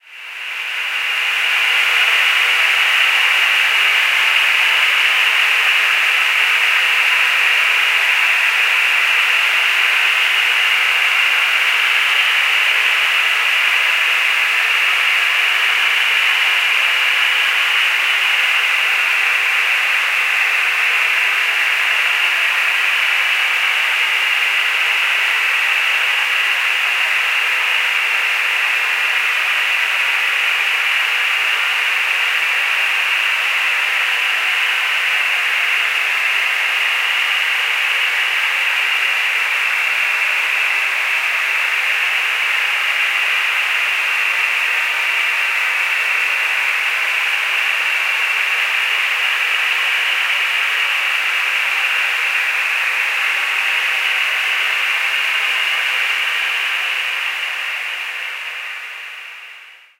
This sample is part of the "Space Drone 3" sample pack. 1minute of pure ambient space drone. An industrial weird drone.
space, soundscape, reaktor, drone